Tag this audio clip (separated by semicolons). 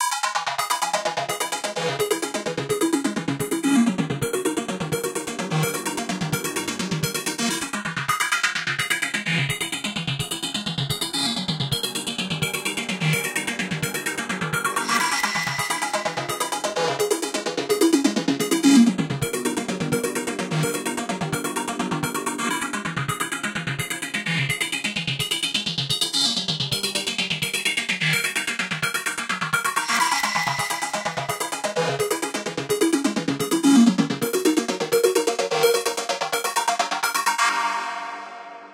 intro; fantasy; loop; computer; robot; intresting; mystery; marvel; wave; Arcade; game; electronics